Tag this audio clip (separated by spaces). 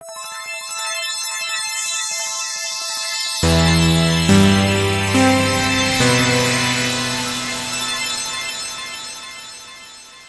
70s logotone resonance sweep synth